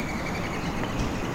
mourning dove wings
The sounds made by the wings of a Mourning Dove as it flies. Recorded with a Zoom H2.
backyard, bird, dove, field-recording, flying, wings